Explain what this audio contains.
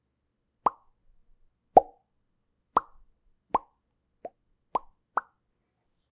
Bubble Pops
Bubble sounds, good for menu or Cartoon Games/Animation
Mouth made.
Recorded with Audacity and edited with Adobe Audition.
Pop, Menu, Water, Bolha, Agua, SFX, Bubble